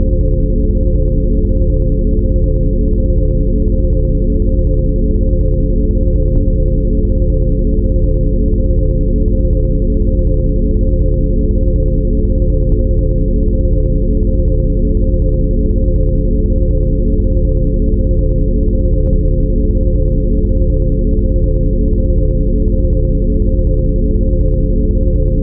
A deep, windy loop that sounds like nothing in particular, but makes you feel uneasy. Could be used to establish a feeling of anxiety or fear.
creepy, air, artificial, phantom, deep, suspense, weird, thrill, spooky, drone, ambience, atmosphere, wind, scary, ambient, dark, haunted, anxious, horror, eerie